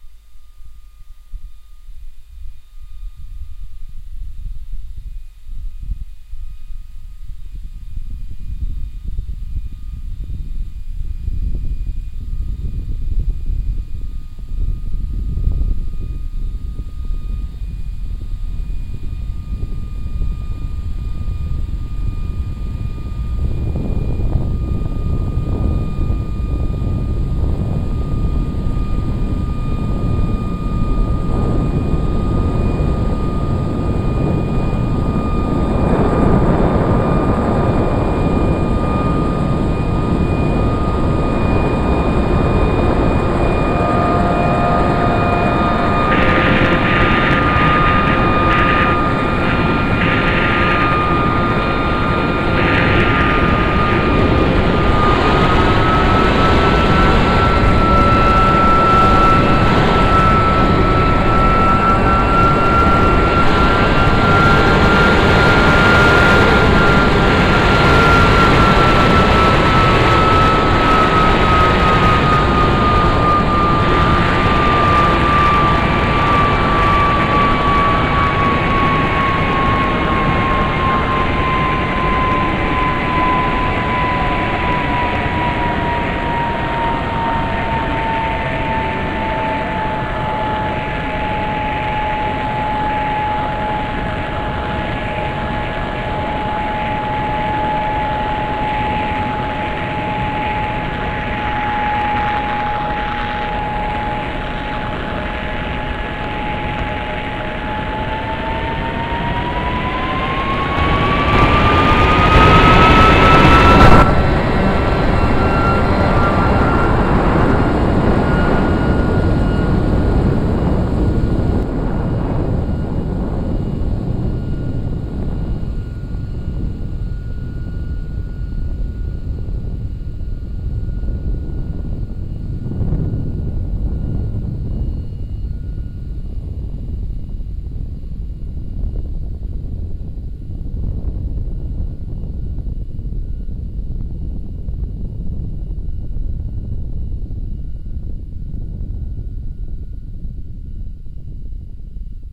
Midnight. Something is landing. A Sea Harrier or other VLTO? In thick forest and no landing lights, hardly. I lift my mobile for calling emergency, but it's dead. I lift my flood light from the seat in my Landcruiser. Dead.Before I do anything else, the 'thing' takes off and vanishes.
power, Landing, mystery, Take-off, UFO, Spacecraft